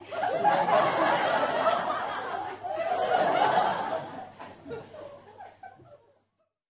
LaughLaugh in medium theatreRecorded with MD and Sony mic, above the people